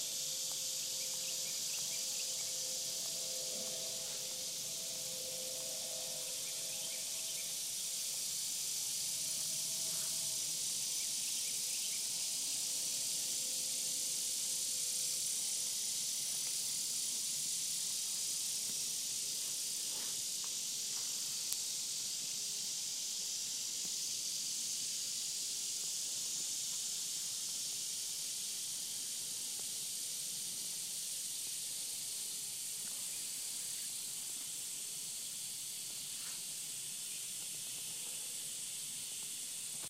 cicadas 8 28 13 10 08 AM
Short field recordings made with my iPhone in August 2013 while visiting family on one of the many small residential islands located in Beaufort, South Carolina (of Forrest Gump, The Prince of Tides, The Big Chill, and The Great Santini fame for any movie buffs out there).
The loud buzzing is the ear-piercing mating call of the cicada insect, a sound that's hard to escape in the sultry summer months and semi-tropical environment of the South Carolina low country. You can also hear tree frogs and I think maybe some birds too. Unfortunately you can also a little ambient whine of an air conditioner at times and me tip-toeing quietly around on the gravel dirt road... because I didn't realize my iPhone would do such a good job of picking up the sound of my footsteps.
I find the sound of cicadas to be hauntingly beautiful.
If you do use them in some way in a project, I'd be curious to hear from you...
day, frogs, insect, South-Carolina, birds, summer, tropical, insects, cicadas, low-country, semi-tropical, Beaufort, night, humid, field-recording, nature, forest, crickets, jungle, USA, hot